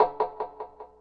striking violin with a bow thru Line 6 delay pedal